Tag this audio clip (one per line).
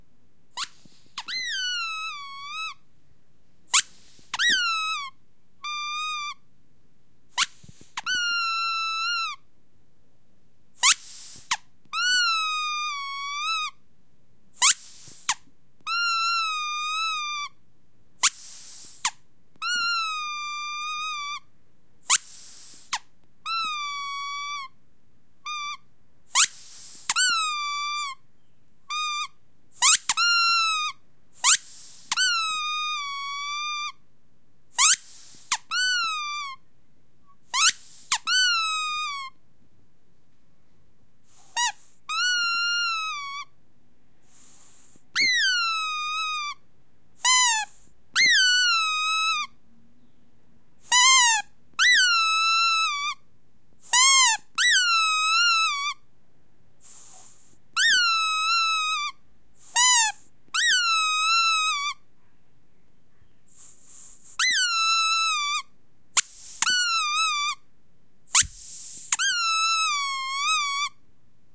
toy
cry
crying